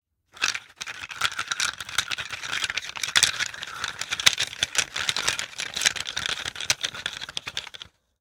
Falling Sticks
Sticks falling to the ground.
falling, lumber, sticks, wood